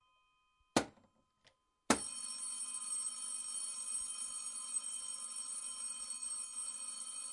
Omas Telefon 03
Recording of an old telephone I found at my grandmothers house. Its from about 1920-1930 and was recorded with a Tascam DR-40.
bell, foley, old, Phone, ring, Telefon, Telephone